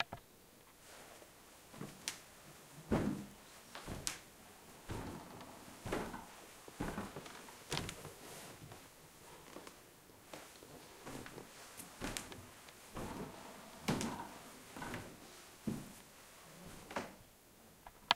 wooden floor, old, creaking, footsteps, walking
Recording of me walking on a old wooden floor.